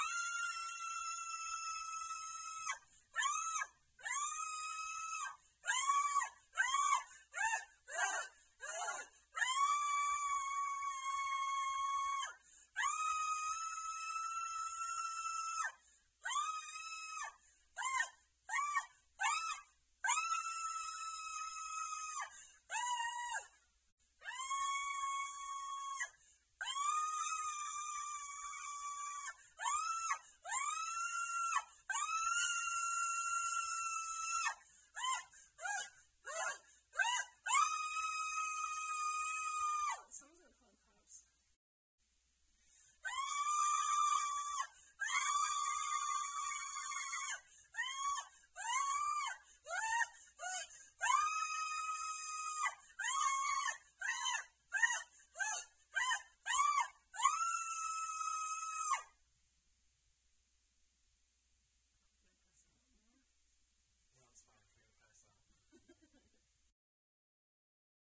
scream woman
fear, shriek, yell, pain, schrill, scream, woman